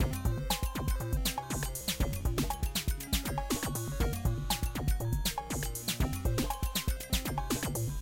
Acidized Beat/arpy bass combo